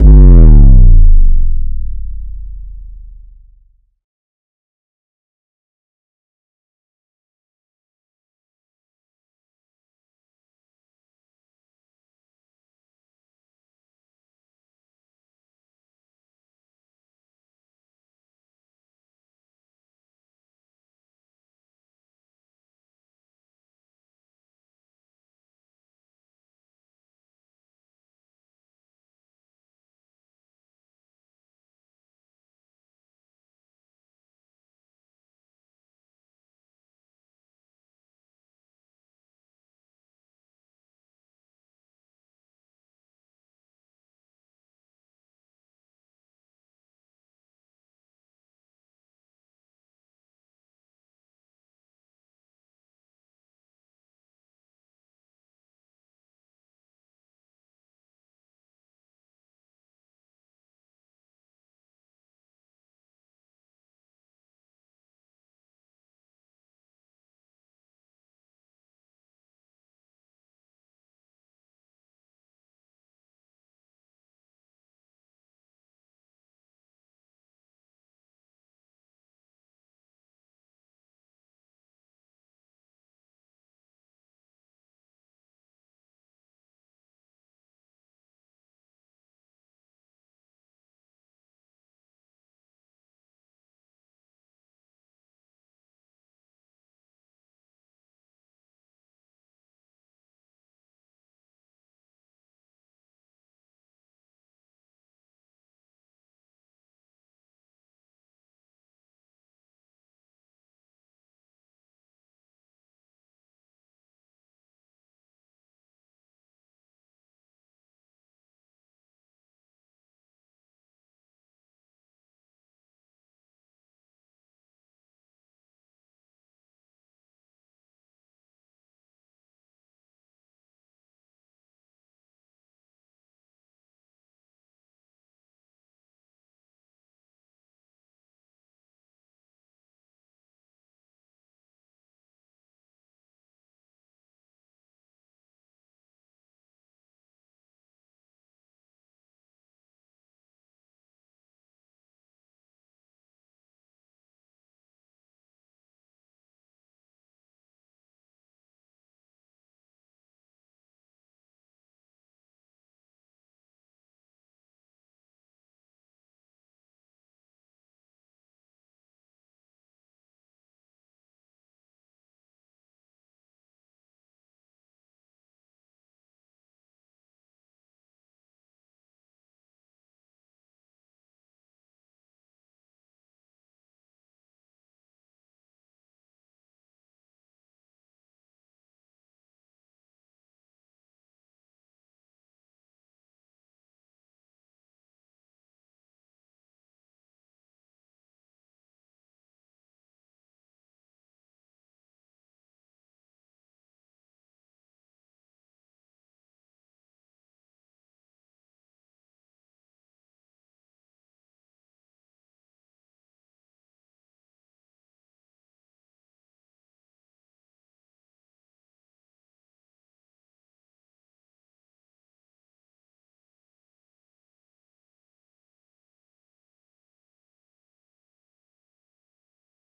Heavy bass drop mate